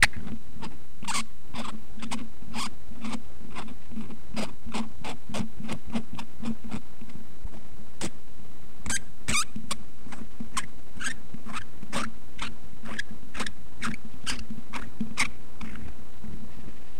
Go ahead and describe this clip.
A squeaking noise made by unscrewing a cap off of a microphone
squeaking, squeak, sound, squeek, twisting, rrt, annoying, screwing